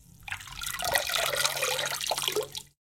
running water drops-02
hand-made running water drops on water of a sink
this one is a water flow
recorded with sony MD recorder and stereo microphone